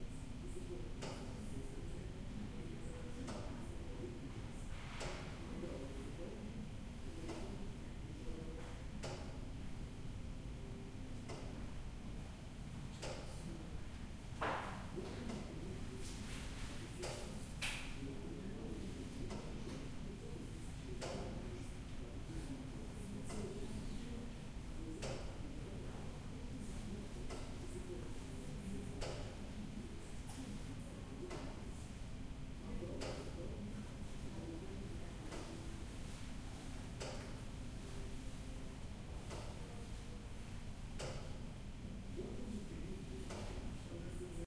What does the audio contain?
Ticking museum exhibit
A ticking, large mechanical exhibit at the National Museum of Ireland - Decorative Arts & History, Collins Barracks, Dublin, Ireland. The exhibit is in an old museum building, with wooden floors, at a quiet time.
noise, atmosphere, museum, gallery, clock, room, mechanical, ambience, exhibit, field-recording, ticking